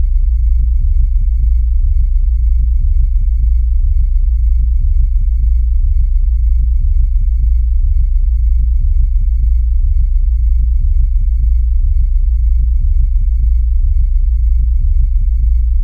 Huge vehicle sound
This sounds is perfect for UFOs :)
Its a deep sound made from 35 Hz bass.
bass, fiction, scifi, space, ufo